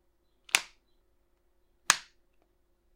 Light switch on off
standard plastic light switch being turned on and off